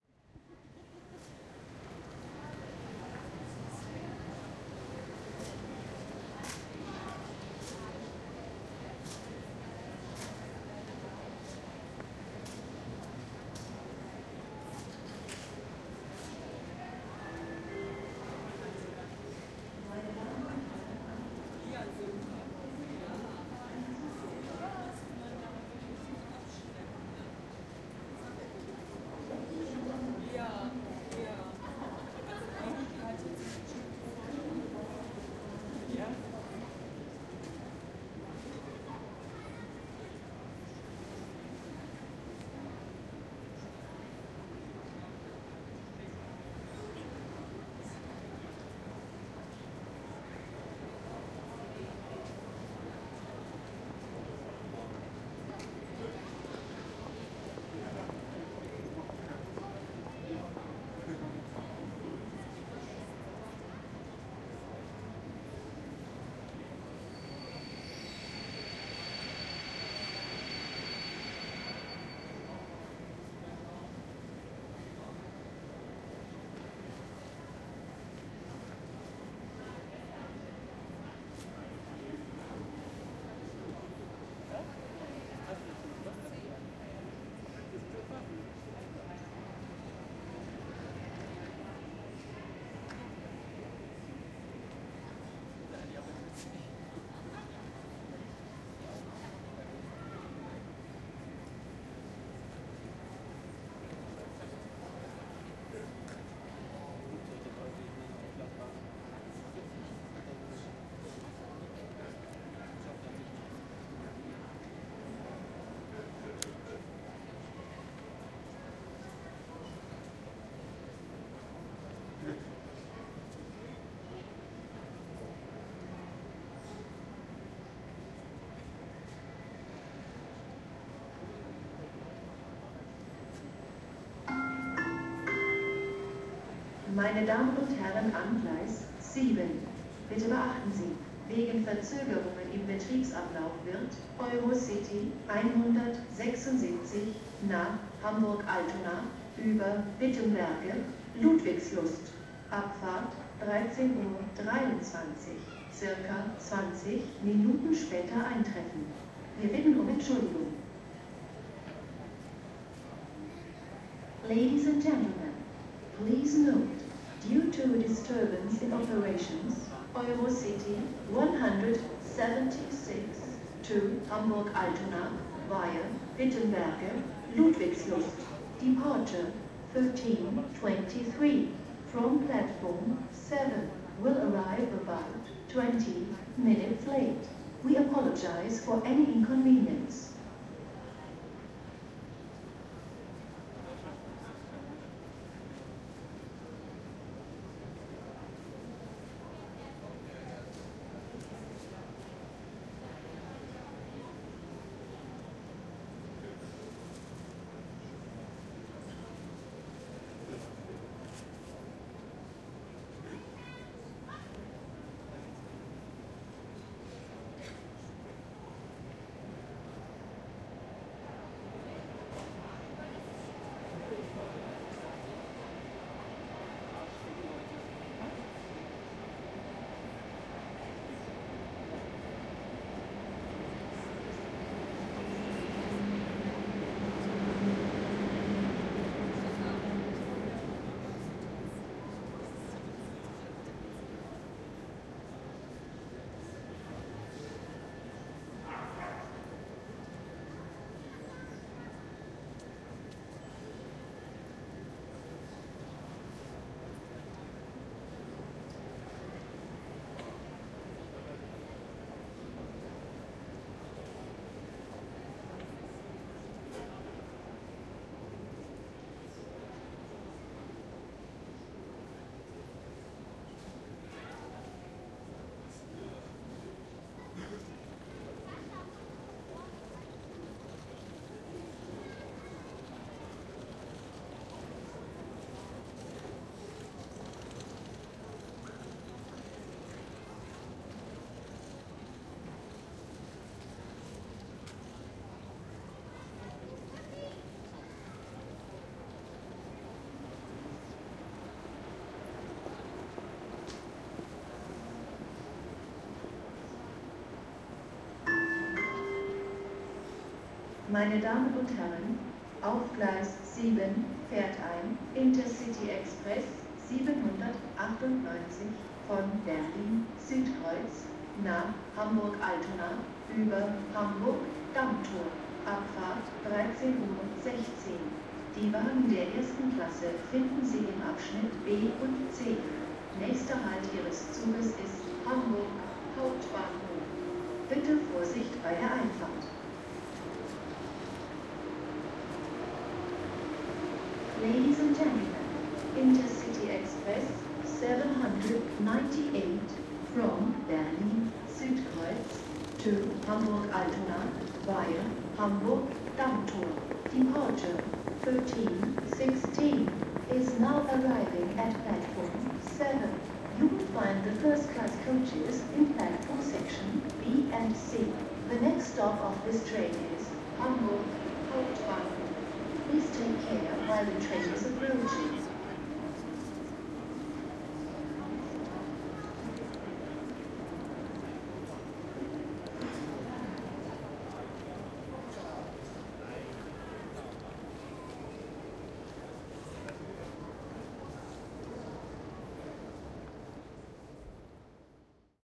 Berlin mainstation april18th2010a
Arriving and departing trains and announcements at Berlin main station, lower deck. Zoom H2
announcement, train, station